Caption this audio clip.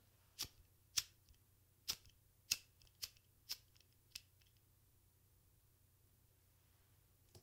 Lighter strike
Striking a lighter multiple times
foley, light, lighter, spot, stick